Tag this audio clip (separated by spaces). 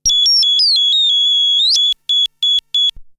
70s science retro fiction effect synth prodigy synthesiser space sci-fi moog